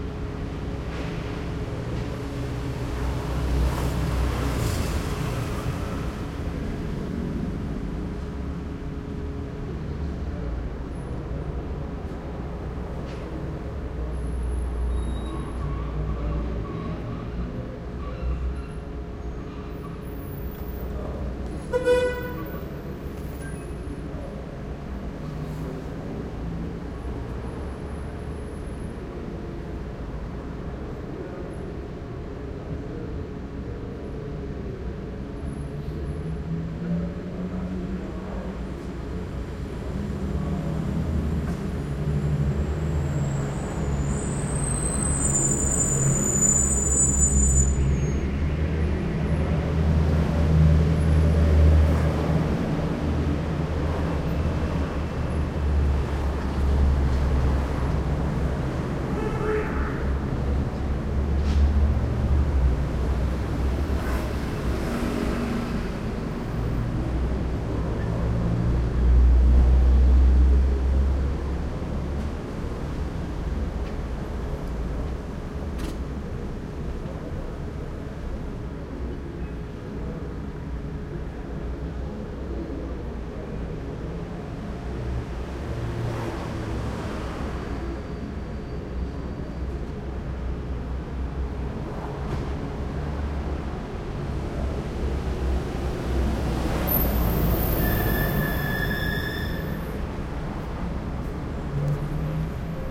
Calle desde terraza
Ambiente de calle tomado desde una terraza en el centro de la Ciudad
street, ciudad, ambiente, field-recording, city, ambience, trafico, traffic, calle